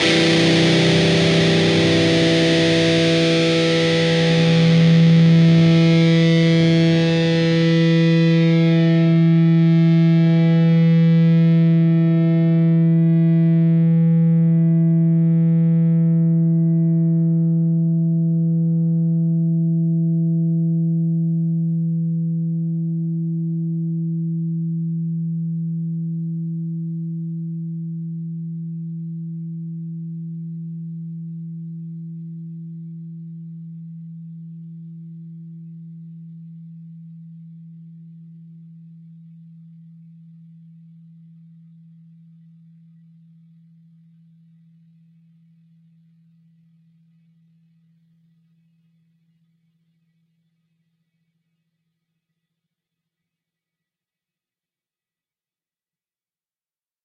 Dist Chr Emin rock
A (5th) string 7th fret, D (4th) string 5th fret. Down strum.
chords, distorted, distorted-guitar, distortion, guitar, guitar-chords, rhythm, rhythm-guitar